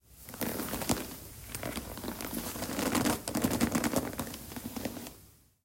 MILOVANOVITCH Heloise 2021 2022 leatherSqueak
I basically recorded my leather satchel as I pressed on it to get some leather sounds. I cleaned the track, removed any sound of metal buckles and tweaked the EQ to boost the highest frequencies. Could be used as a sound for an armchair or maybe a saddle.